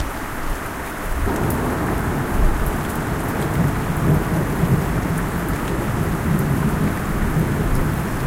Rain and Thunder
Loopable recording of a thunderstorm.